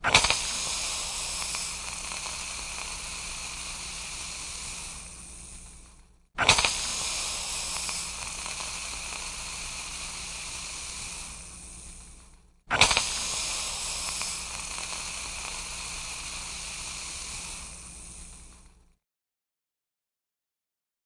iron steam
Clothing iron, repeated steam.